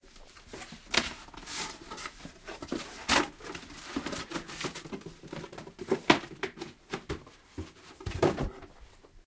unpacking gift
unpacking a gift from a paper-box.